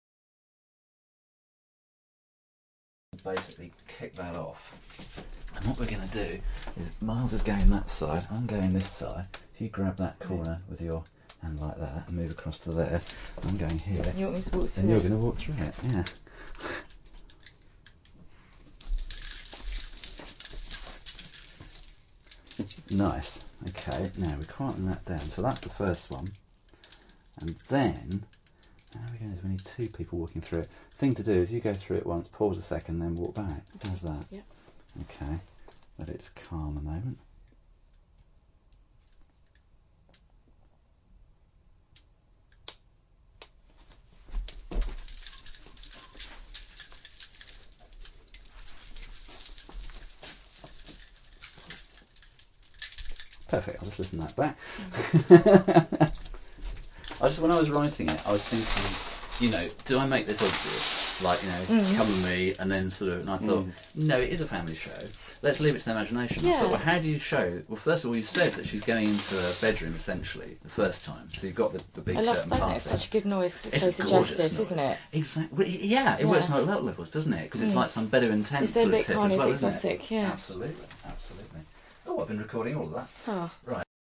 Bead curtain
Sound of a bead/shell curtain being walked through and the walked through and back
curtain, walk, bead, through